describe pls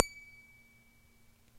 Recording of me plucking the strings on the headstock of my cheap Rogue guitar. Recorded direct to PC with a RadioShack clip on condenser mic.